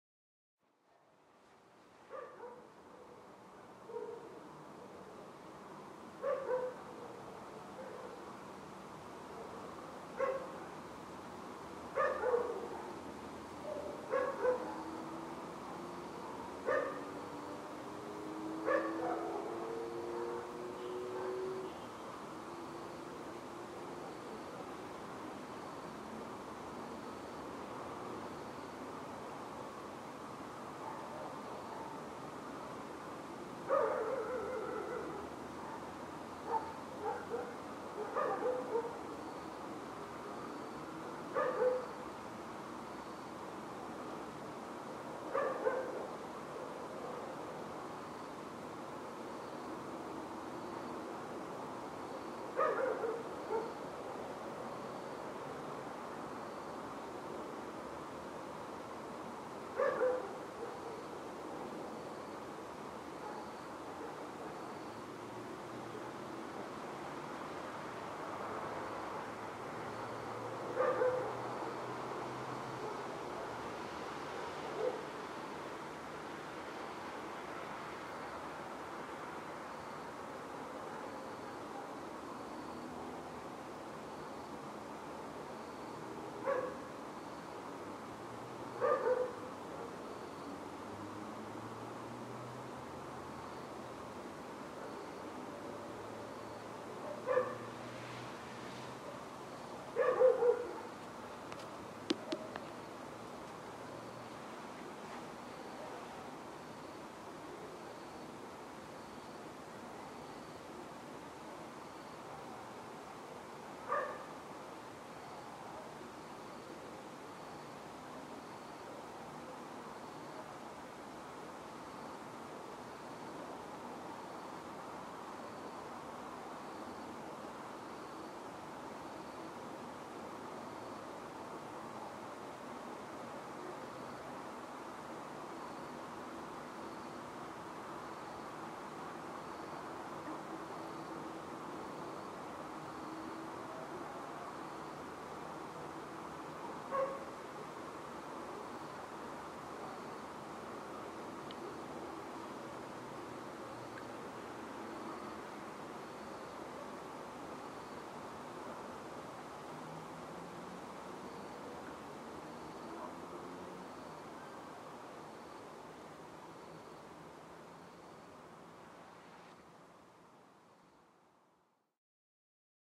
outside night ambience